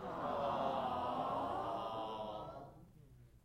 Group of people saying "awwwwww"
According to the urban dictionary: "Awwww" is used to express a sentimental reaction to warm fuzzy experiences. (The number of W's at the end is arbitrary, but at least two or three normally occur in this word.) Also used as an expression of sympathy or compassion.
Sony ECM-99 stereo microphone to SonyMD (MZ-N707)
crowd, group, human, sympathy